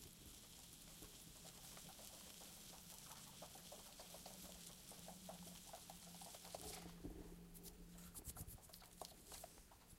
Superworms Squirming 1
Superworms squirming in a box with fine sand.